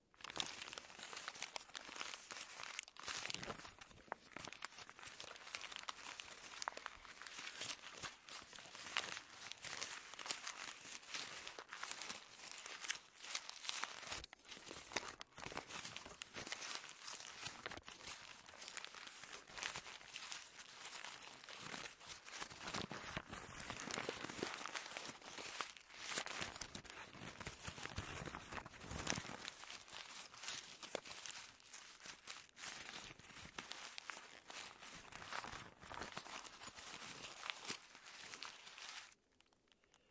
mySound Piramide Didem
Sounds from objects that are beloved to the participants pupils at the Piramide school, Ghent. The source of the sounds has to be guessed
BE-Piramide, crumbling-paper, mySound-Didem